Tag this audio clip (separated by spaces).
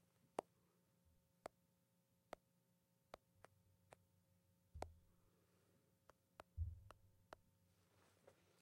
phone tap